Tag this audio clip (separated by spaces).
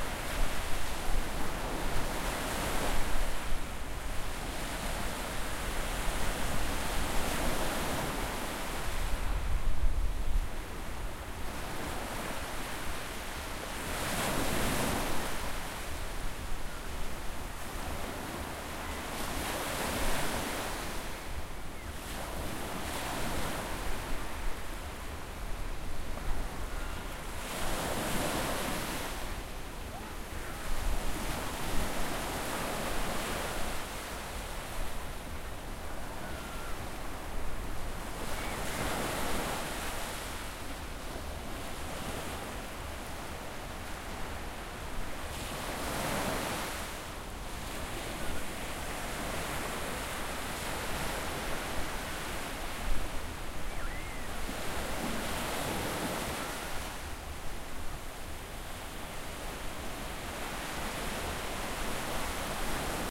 windy waves sea water